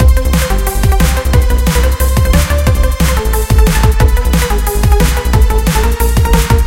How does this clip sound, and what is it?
Arakawa DnB V1
A set of drums and synths in this fast loop. This is the first version with a loud snare.
beat, drum, 180bpm, drum-and-bass, modern, drum-loop, loop, dnb, drumbass